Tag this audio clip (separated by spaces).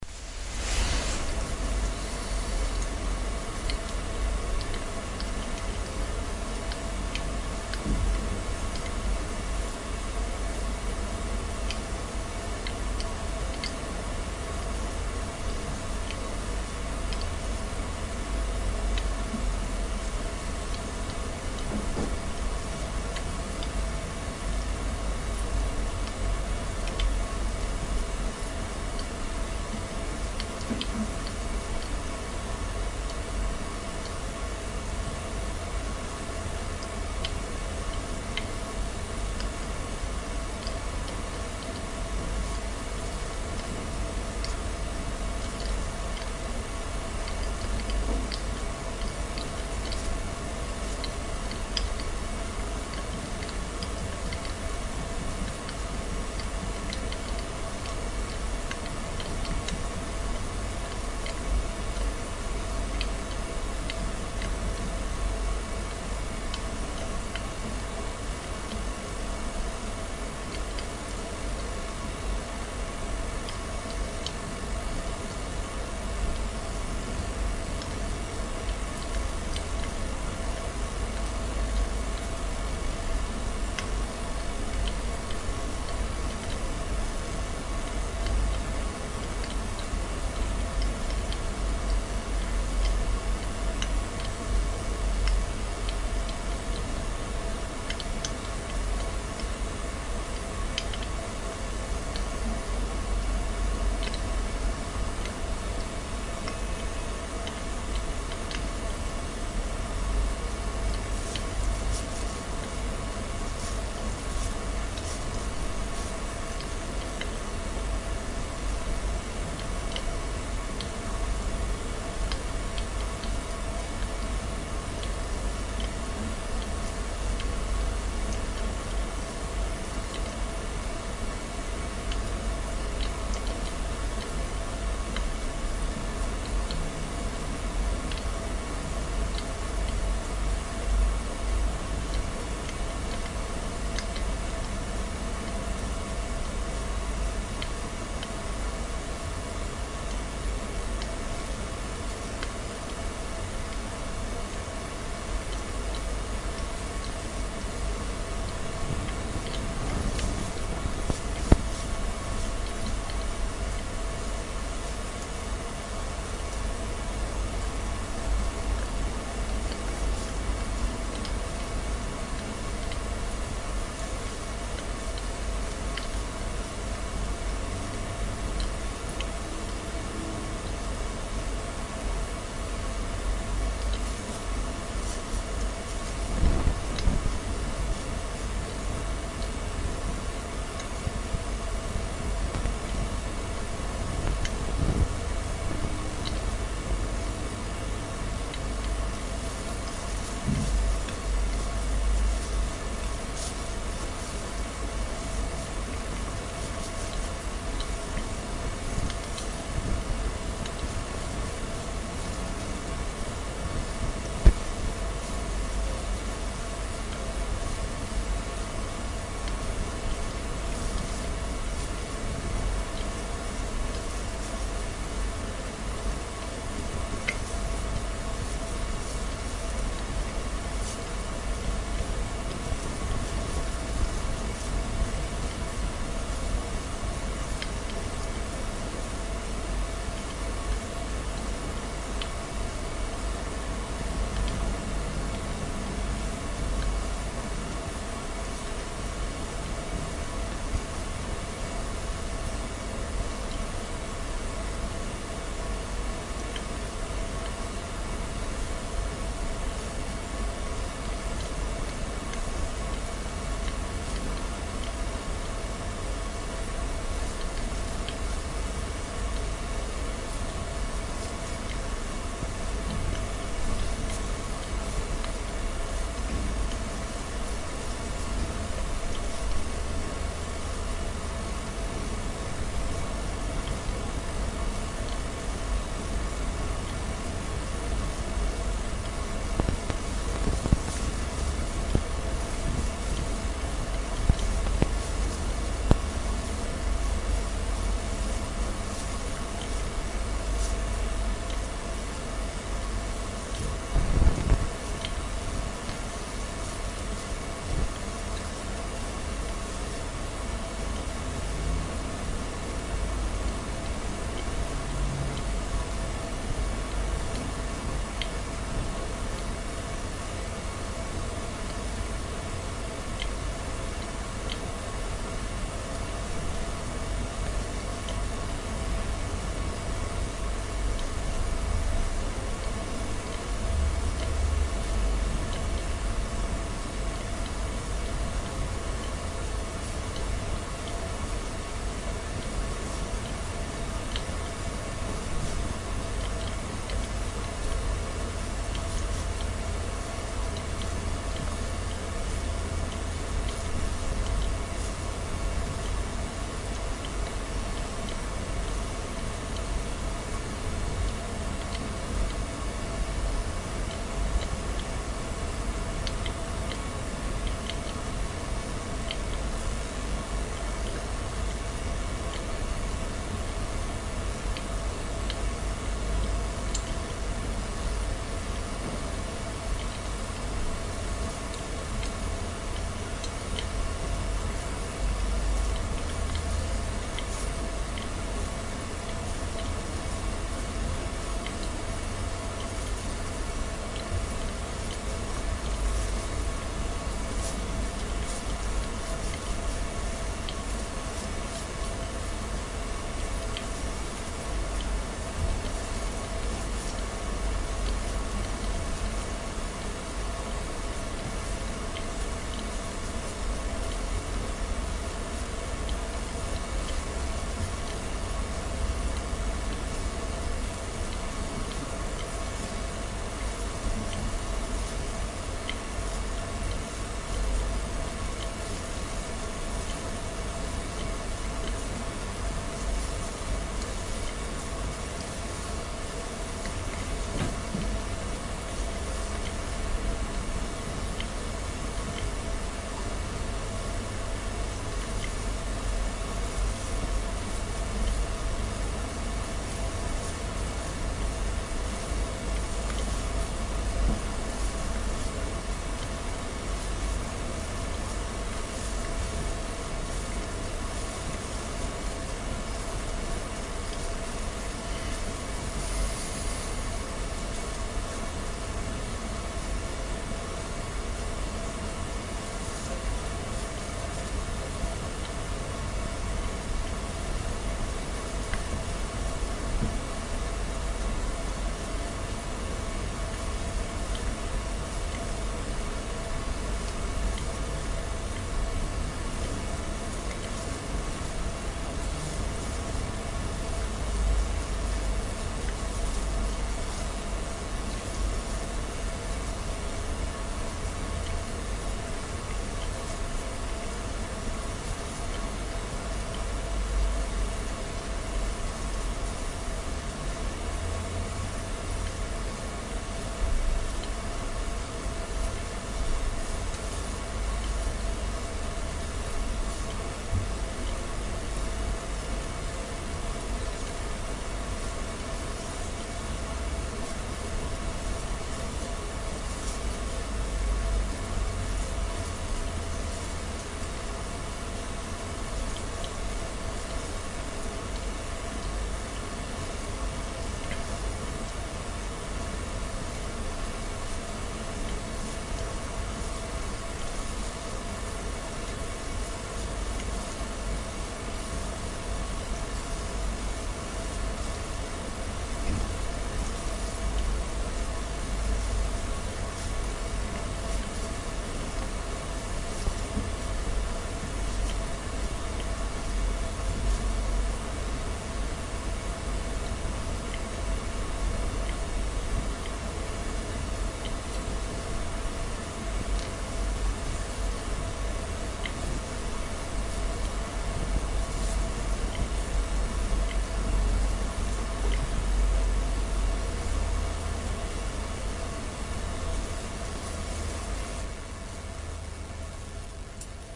Lens Jitter Path Beam Switch NOx Trail Katamine Wifi T1xorT2 Reluctor Channel Dual Wireless Synchronous Runner Dongle Optical Iso Fraser c Battery COx Gynoida Chassis T2 Atmospheric SOx